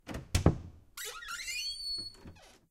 Squeaky door opened quickly
Opening of squeaky wooden door. Recorded in studio (clean recording)
creak, creaky, door, fast, handle, open, squeak, squeaky, wood, wooden